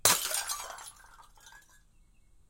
Bottle Smash FF174
1 medium/high pitch bottle smash, extended breaking, liquid, hammer
medium-pitch, bottle-breaking, Bottle-smash